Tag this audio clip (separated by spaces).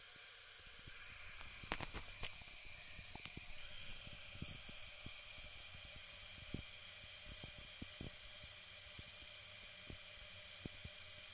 sink college